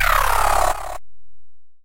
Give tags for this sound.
effects; FX; Gameaudio; indiegame; SFX; sound-desing; Sounds

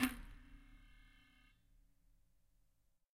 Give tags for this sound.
acoustic; percussive; rub; spring; metalic; scrape; wood

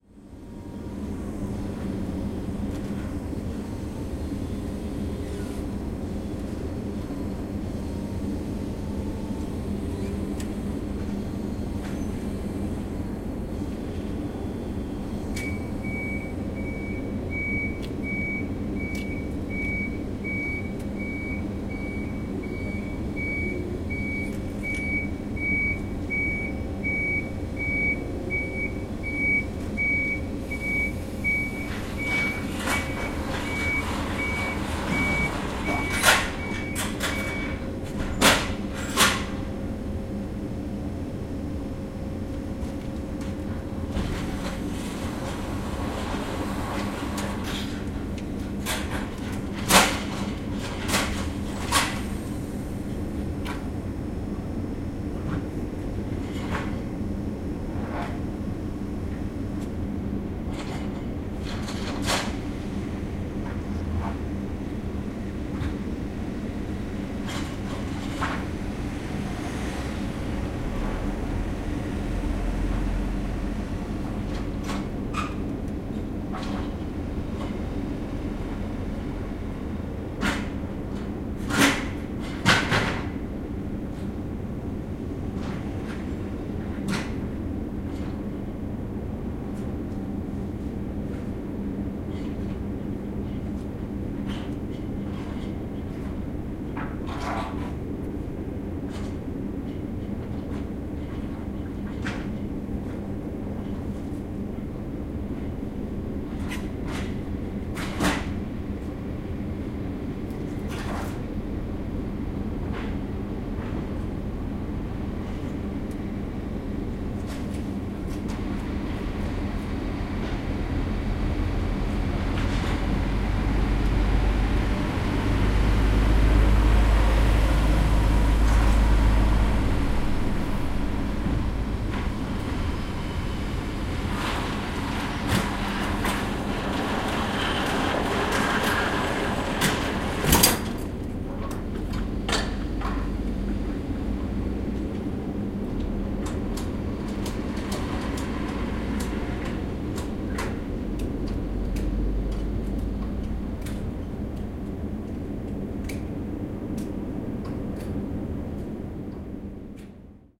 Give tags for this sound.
beat; beeping; cars; drone; field-recording; forklift; noise; rattle

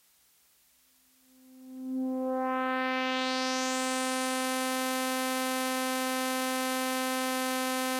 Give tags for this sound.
Sample; korg; sound

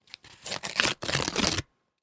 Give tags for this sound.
slowly; break; paper

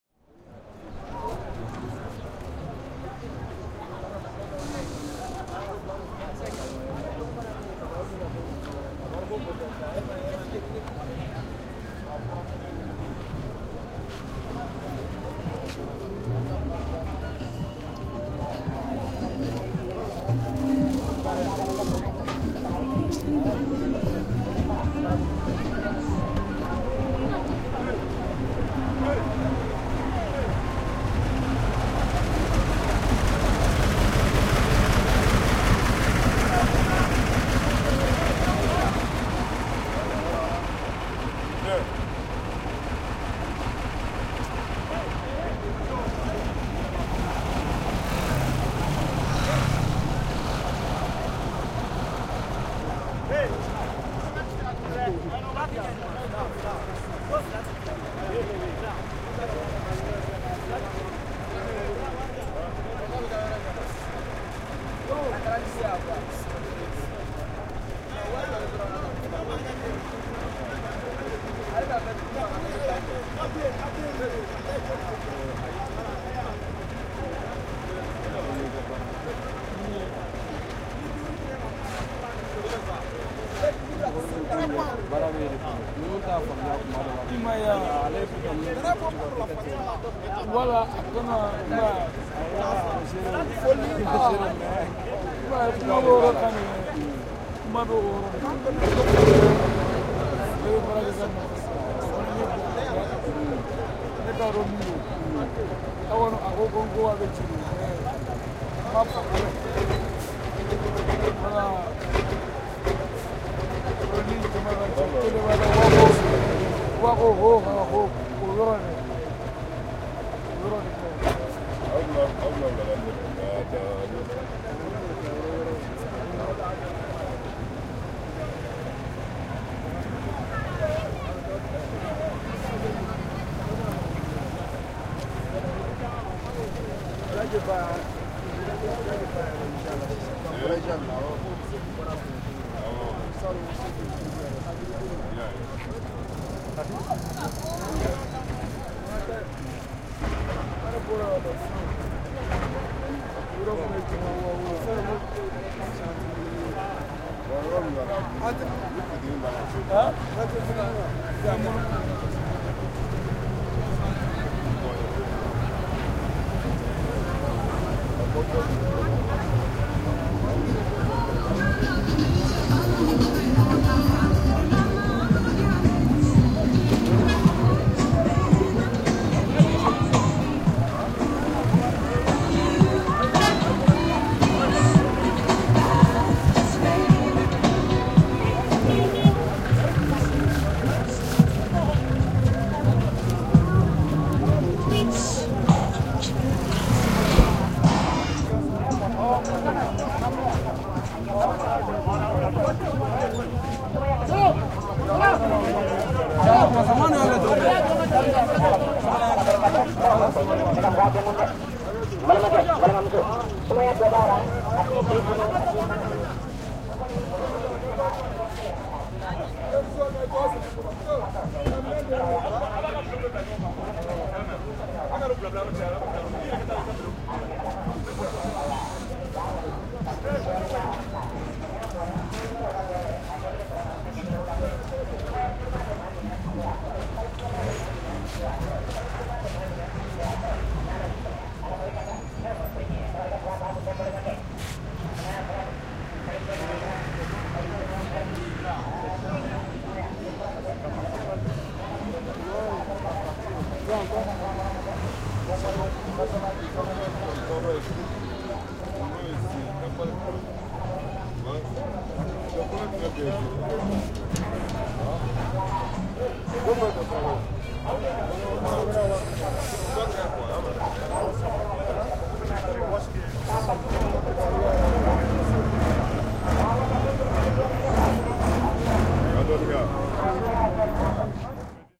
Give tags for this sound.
walking; Africa; radio; people; buses; vehicles; Field-recording; voices; talking; music; women

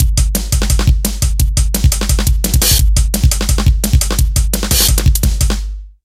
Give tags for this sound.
172bpm; DnB; Drum-and-Bass; loop; loops

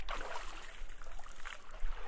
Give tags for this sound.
splash; water

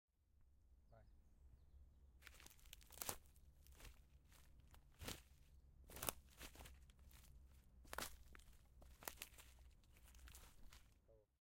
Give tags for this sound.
cam; ulp

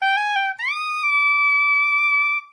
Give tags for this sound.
soprano-sax; soprano; melody; saxophone; loop

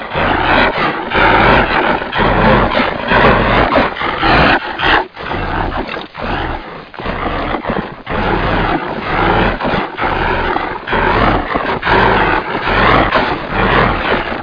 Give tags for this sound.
Horror; Monster; Creepy; Growl; Dogscape; Growler; Scary; Spooky

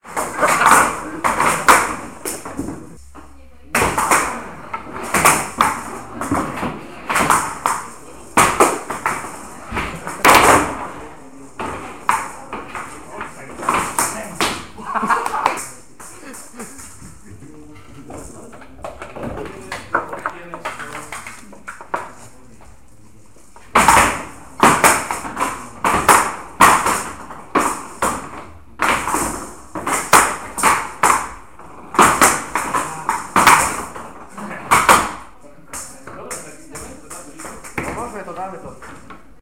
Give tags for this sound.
showdown; blind; ball; sport; game

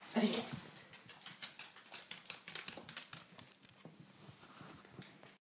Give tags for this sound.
walk; dachshund

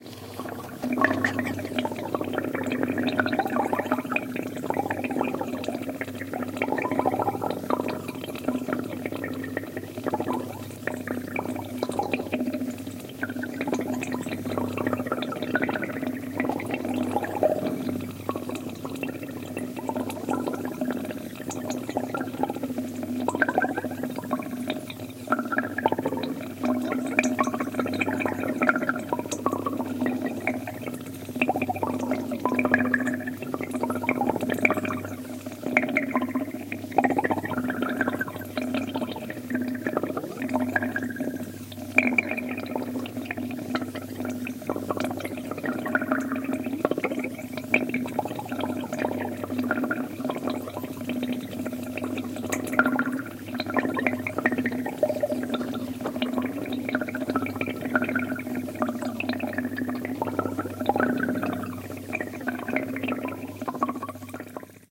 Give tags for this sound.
drips; gurgle; gurgles; stereo; water

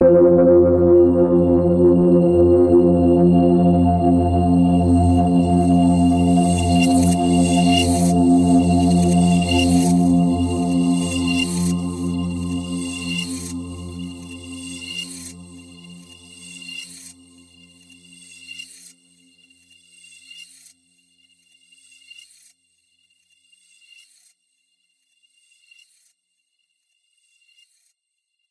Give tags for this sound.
multisample; granular; dark; multi-sample; synth; ambient

